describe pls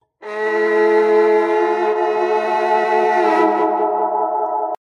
creepy euphoria violin
starts creepy but goes well
dramatic, euphoria, space, film